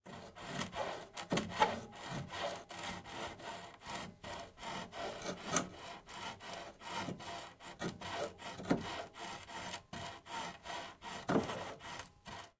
Recoreded with Zoom H6 XY Mic. Edited in Pro Tools.
Person wields a hacksaw.
Metallic, Noise, hacksaw, Metal, Industrial, saw